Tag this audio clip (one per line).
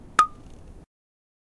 drum-kits
hi-hats
metallic
percussive
sample-pack